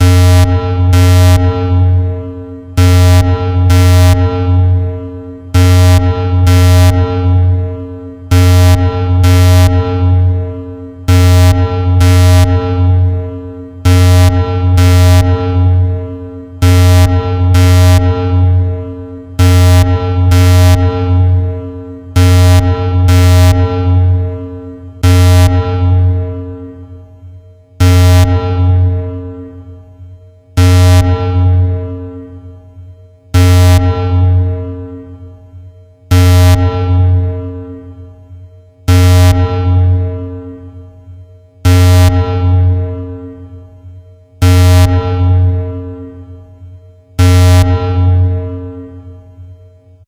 We've all been in the situation, where we needed a massive nuclear alarm sound effect. So I made one.
Please don't flag it as offensive. Yes it is a massive nuclear alarm!
alarm, alarms, blast, bomb, bombs, destruct, explosion, fx, game, games, gamesound, nuclear, nuke, nukeblast, plant, power, powerplant, self, selfdestruct, sfx, spaceship, warning, warnings